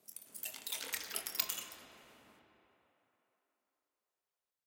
Dropped, crushed egg shells. Processed with a little reverb and delay. Very low levels!
splinter, ice, crush, crunch, eggshell, crackle, drop